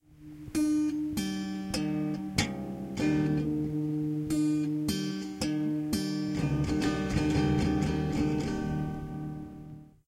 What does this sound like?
Testing the "Aggregate Devices" feature on a Mac. I believe this was recorded with two Logitech USB microphones, though this recording was slightly long ago, so I forgot how it was recorded. Me strumming my guitar. Okay, I admit, this isn't the pure recording. I enchanced the "right" track because it felt too soft. So that's all I processed it (besides a fade in/out). Use it anyway you want, I don't care.